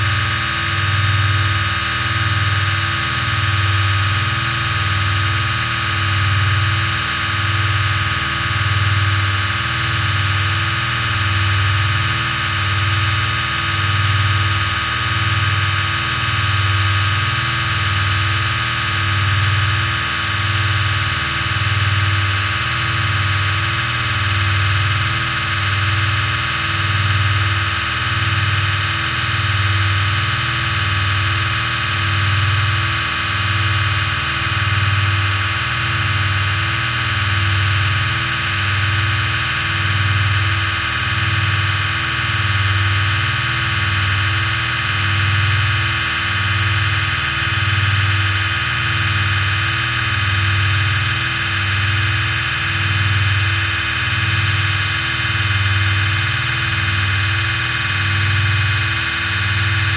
3x256 500k reso 5000hz y freq float 1pointfloat
Sound created from using the rings of Saturn as a spectral source to a series of filters.
The ring spectrogram was divided into three color planes, and the color intensity values were transformed into resonant filter cutoff frequencies. In essence one filter unit (per color plane) has 256 sounds playing simultaneously. The individual filters are placed along the x-axis so, that the stereo image consists of 256 steps from left to right.
In this sound of the series the spectrum was compressed to a range of 20 - 5000 hz. A small variation in certain divider factor per color plane is introduced for a slight chorus like effect.
noise, resynthesis, experimental, resonance, filter, chorus-effect, space, fft, saturn